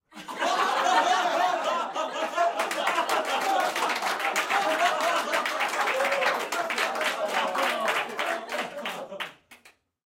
Recorded inside with about 15 people.